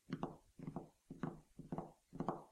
Fingers tapping on a bench.